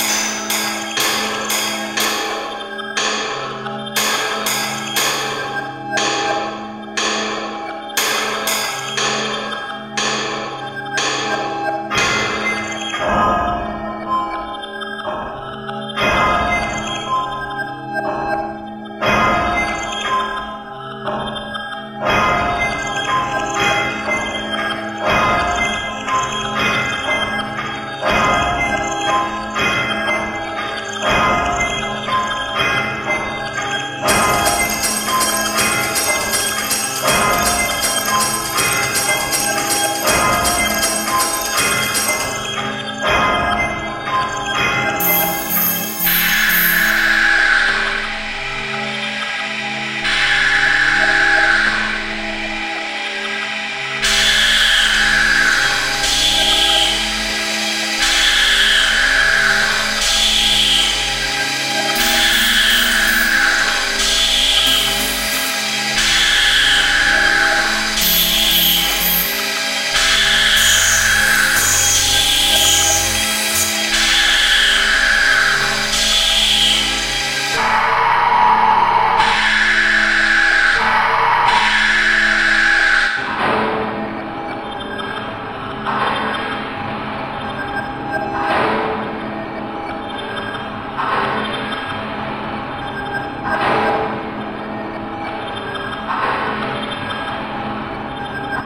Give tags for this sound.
ambient; drone; industrial; machine; machinery; mechanical; robot; robotic

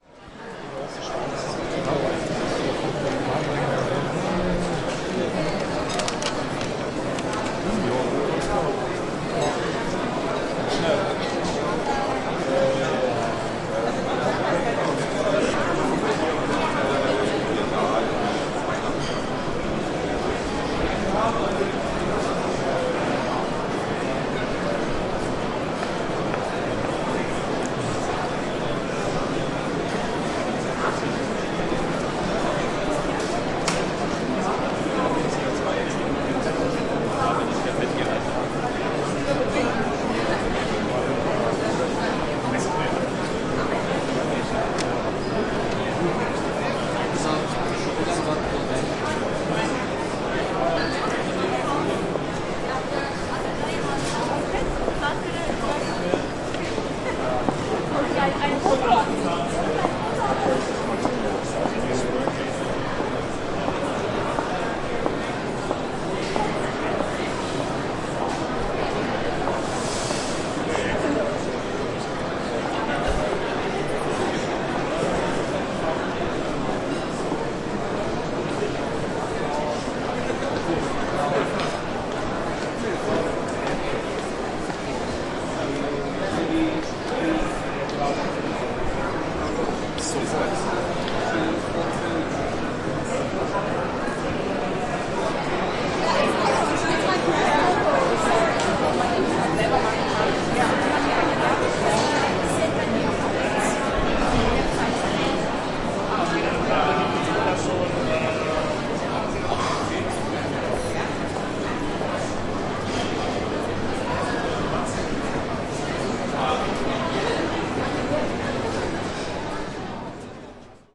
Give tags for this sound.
hall; voices; field-recording; exhibition

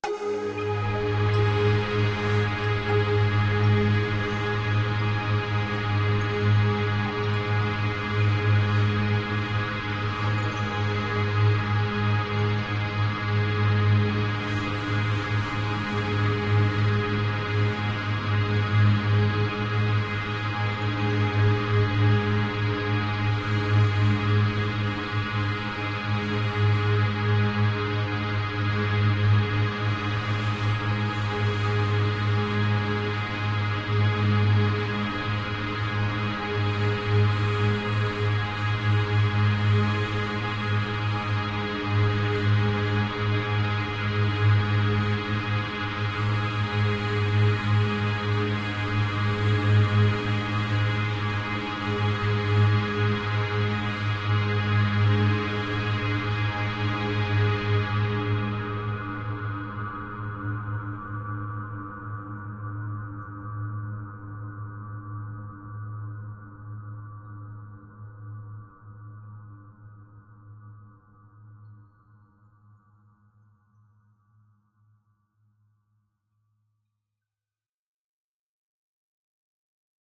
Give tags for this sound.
artificial; drone; multisample; organ; pad; soundscape

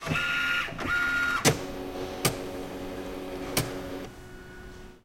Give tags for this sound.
scan; scaner-on; campus-upf; UPF-CS12; scaner-noise; library; scaner-power; scaner; factoria-upf

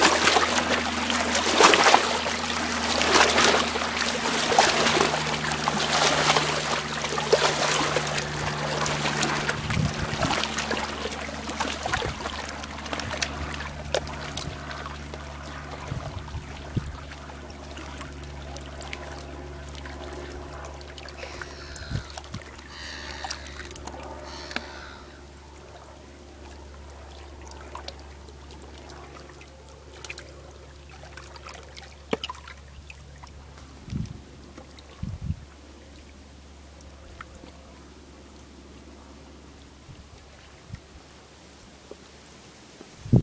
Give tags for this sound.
waves; wake; lap; field-recording; river; splash; water; rocks

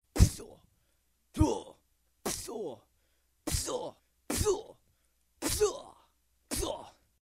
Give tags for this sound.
dying; grunt; groan; kyma; die; solo; fx; effects; bullet; liquid; squirt; dies; sound; breath; shot; guy; gun; roar; microphone; mic; blood; hit; voice; zombie; moan; gets

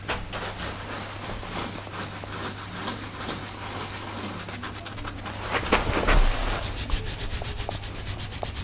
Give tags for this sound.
head; scratching

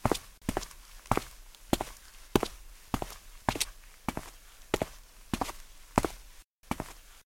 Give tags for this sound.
dead-season; foley; step; walk; dirt; floor; boot

